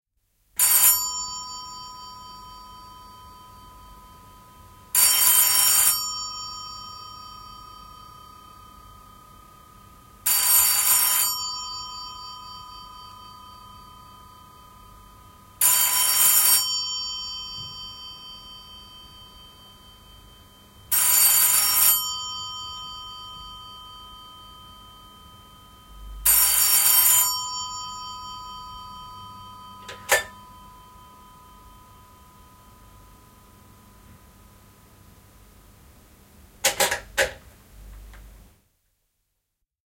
Vanha 1940-luvun malli. Puhelin soi, pirisee huoneessa. Luuri ylös ja alas.
Paikka/Place: Suomi / Finland / Helsinki
Aika/Date: 08.12.1982